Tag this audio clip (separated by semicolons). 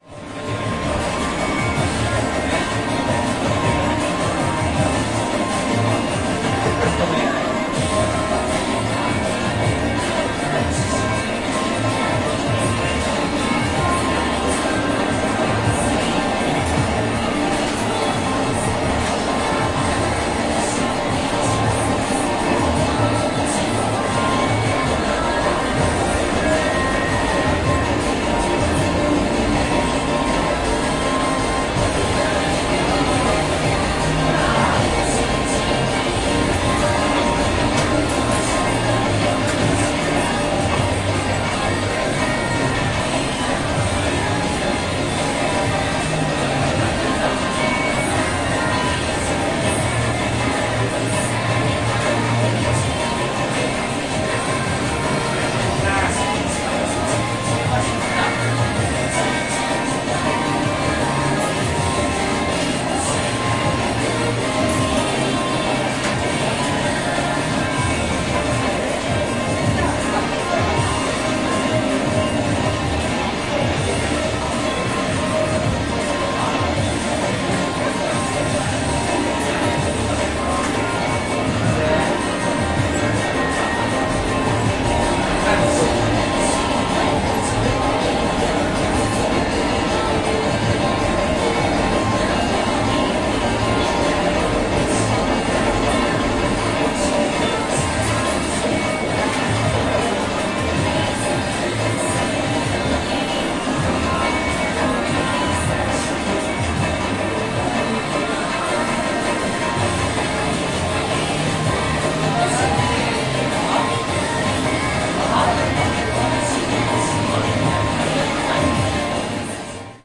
field-recording; music; machine; japan; tokyo